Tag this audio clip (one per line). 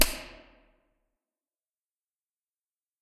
hurt
slap
reverb
echo